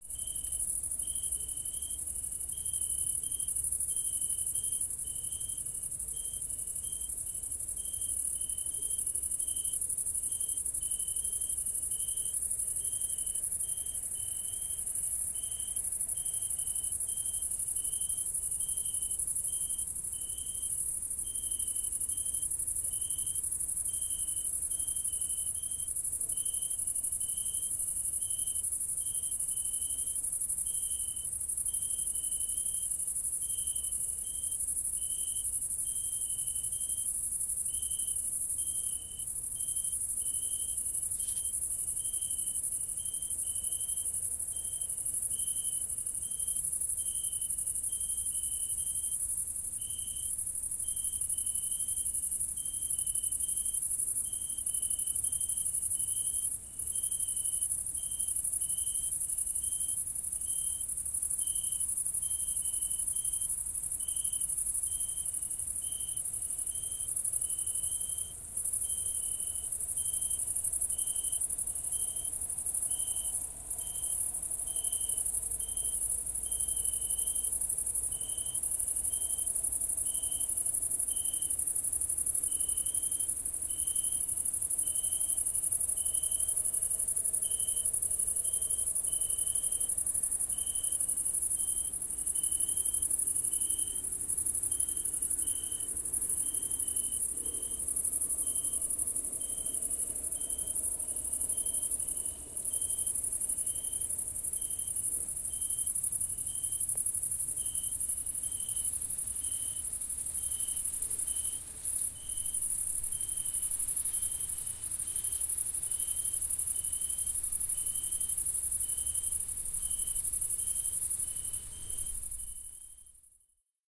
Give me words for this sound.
Summer night ambience, with combine harvesters far away. Recorded at a grain field near the village Héreg using Rode NT4 -> custom-built Green preamp -> M-Audio MicroTrack. Unprocessed.